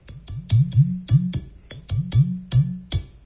Playing another loopable samba rhythm - actually the part of the surdo, the bass drum in brazilian samba baterias - on a sphere shaped glas vase, tapping with one hand on the outer surface, with the other on the opening, thus producing a low tone, which resembles the tone produced by an udu. The udu is an African drum originated by the Igbo and Hausa peoples of Nigeria, normally built of clay. Vivanco EM35, Marantz PMD671.